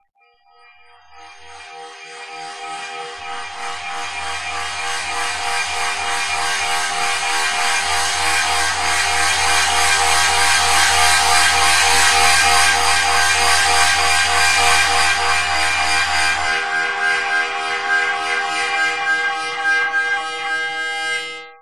My dad had an old beat-up mouth organ, which was double tuned, in micro-tones to give a shimmering vibrato effect. I loved to just explore the overtones, and recompose them to make complex chords.